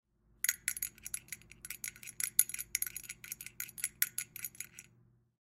Muffled bell being rung